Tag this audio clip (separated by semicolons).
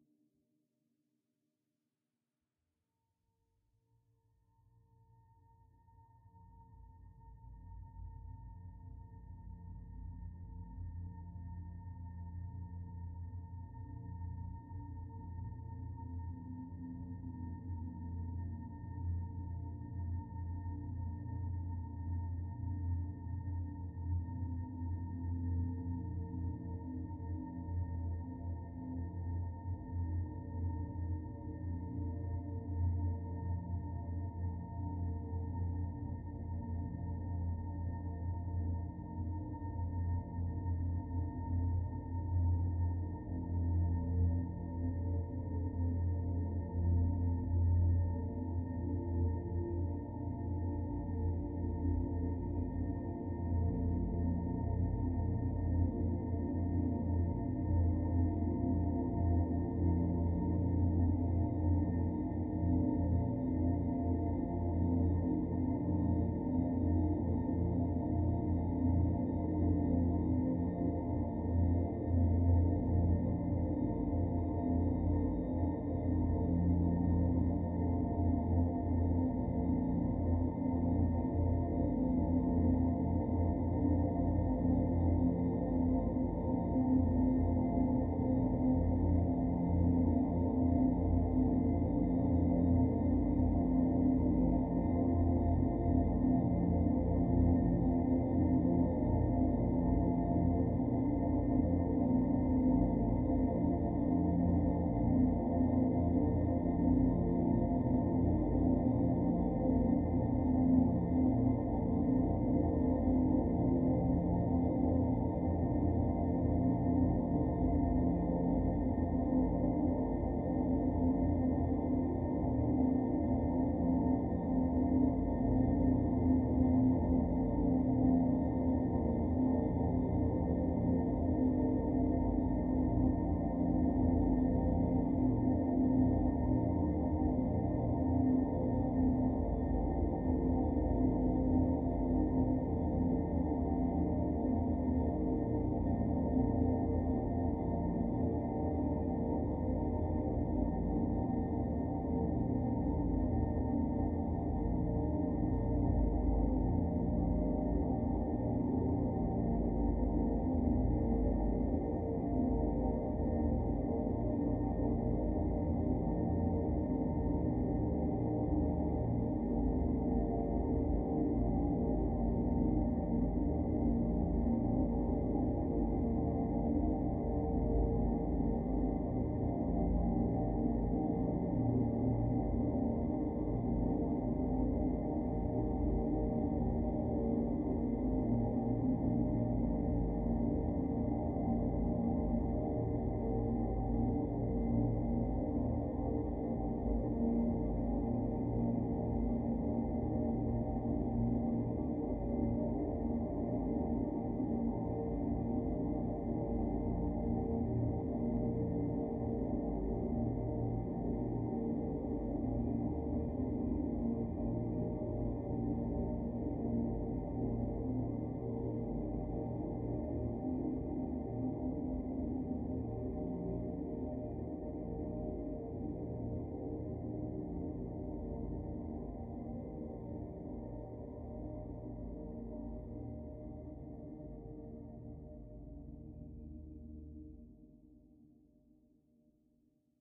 sweet; divine; drone; multisample; pad; soundscape; experimental; evolving; dream